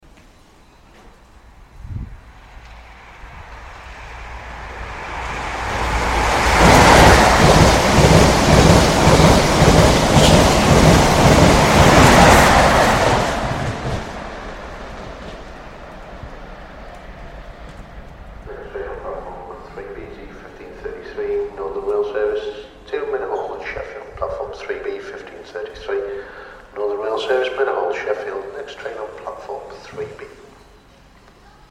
Passing HST
HST operated by Eastcoast passing Doncaster at speed.